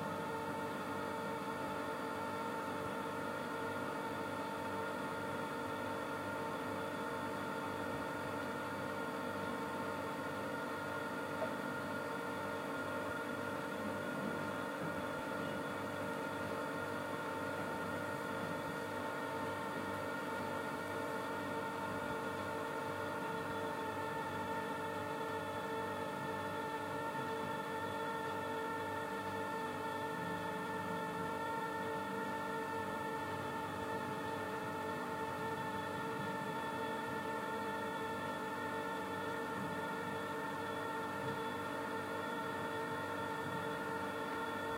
Fridge engine
The sound of a fridge in a kitchen.
Recorded with Zoom H4n built in stereo mic.
electric, household, kitchen, loop, machine, noise